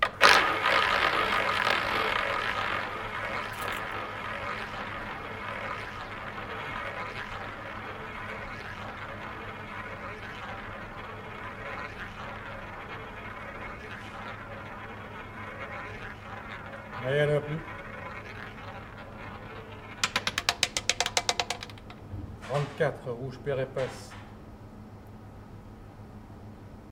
Evian casino croupier makes roulette roll "34 rouge, pair et passe". Recorded at Evian Casino at morning when the roulette salon was closed to public . mono schoeps